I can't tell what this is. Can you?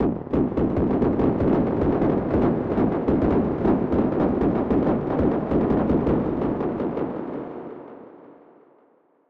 digiti bombing
some distant bombing
digital, fx, harsh